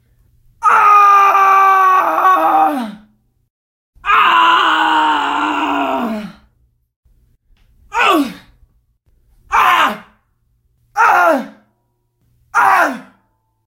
Male Screams [1]
A small compilation of myself screaming, and my first try at voice work!
loud, shout, fear, death, hurt, die, agony, yell, shouting, dying, painfull, human, male, vocal, pain, painful, screams, scream, voice, screaming, horror, yelling